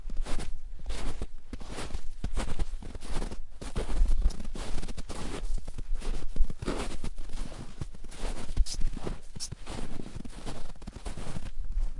Footsteps in soft snow. Recorded with Zoom H4.